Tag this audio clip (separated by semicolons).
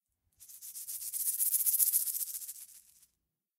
romper; Restrillar; crujir